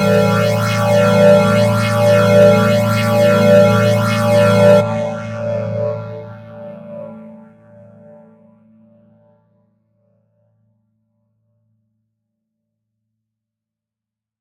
FL studio 12
VSTI: 3x Osc-A4
Tone: A4
Tempo: 100
Maximus: warmness 1
F Reeverb 2: chatedral
F Flanger: moving
Vocodex: 4 drums
FL, Studio, field-3xOsc, field-fl, field-recording